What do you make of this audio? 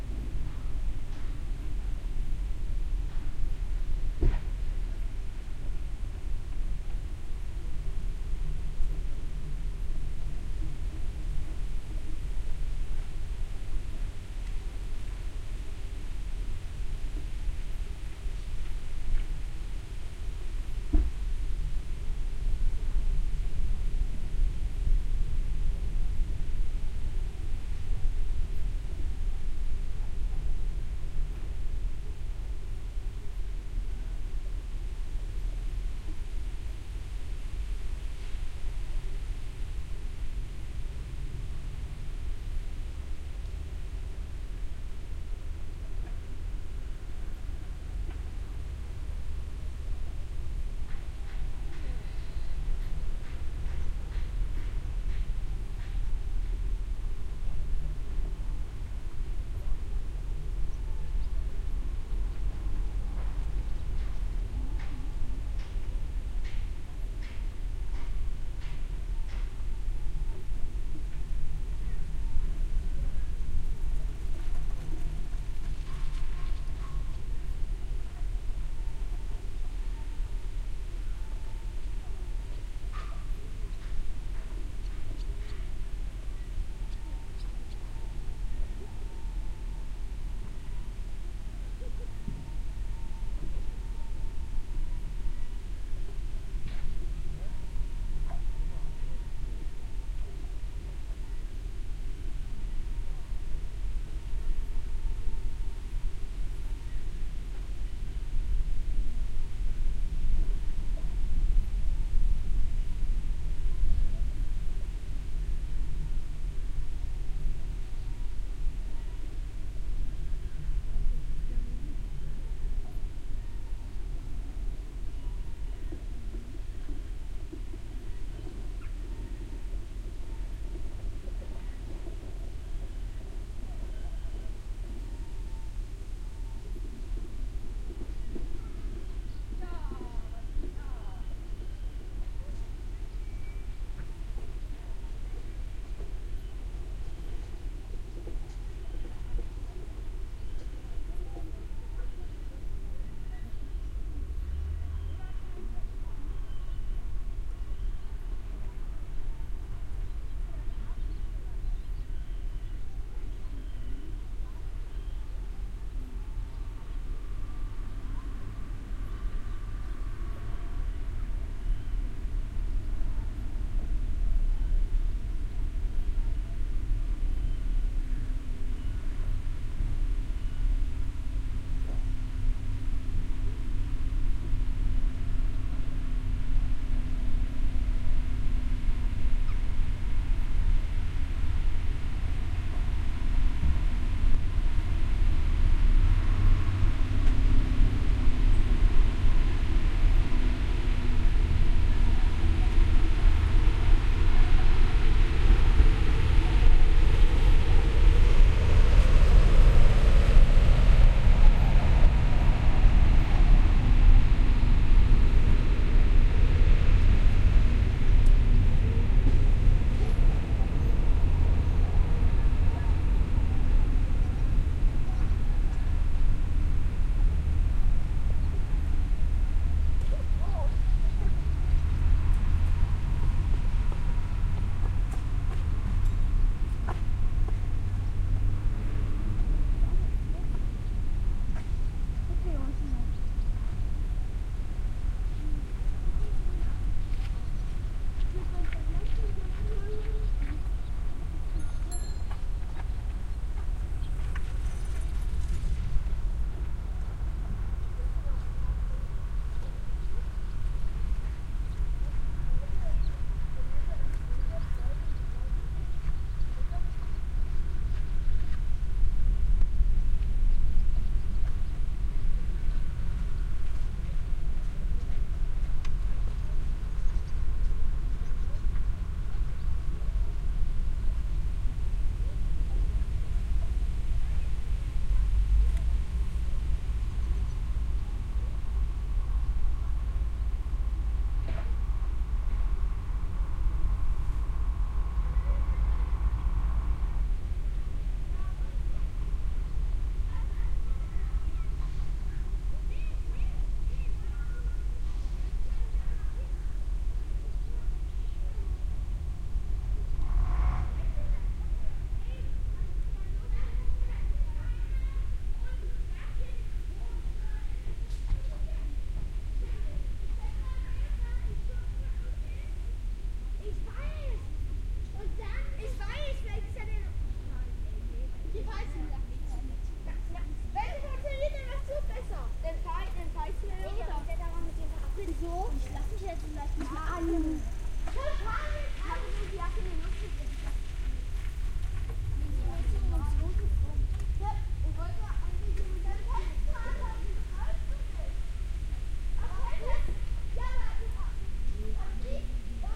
This track was recorded in September 2009 in the brilliant town of Tangermuende, near where the river Tanger meets the river Elbe. Tangermuende can look back at an 1000-year history. Brick buildings, an almost entirely preserved city wall with well-fortified gates, the castle complex and a variety of the half-timbered houses lend a unique charm to the town. Not much happening on this track.., but I couldn´t resist putting it on the map. Shure WL187 microphones, FEL preamp into R-09HR recorder.